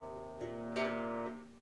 Tanpura twang 02 E flat
Snippets from recordings of me playing the tanpura.
Tuned to E flat, the notes from top to bottom are B flat, E flat, C, low E flat.
In traditional Indian tuning the root note in the scale is referred to as Sa and is E flat in this scale The fifth note (B flat in this scale) is referred to as Pa and the sixth note (C) is Dha
I noticed that my first pack of tanpura samples has a bit of fuzzy white noise so in this pack I have equalized - I reduced all the very high frequencies which got rid of most of the white noise without affecting the low frequency sounds of the tanpura itself.
Please note this is the tanpura part of an instrument called the Swar Sangam which combines the Swarmandal (Indian Harp) and the Tanpura, it is not a traditional tanpura and does sound slightly different.
ethnic, indian, tanpuri, swar-sangam, bass, tanpura, tanbura